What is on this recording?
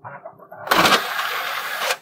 Disc drive eject
DVD drive ejecting a disc.